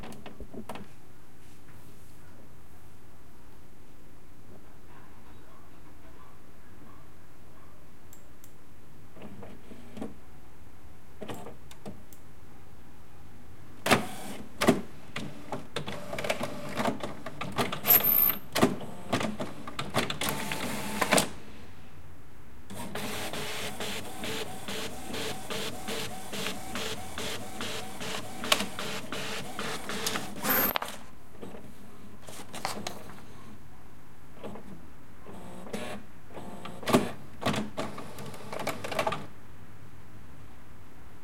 Printing with an HP Photosmart C4400 printer. Recorded with a Zoom H4n portable recorder.
print, printing, printer, machine, mechanical, hp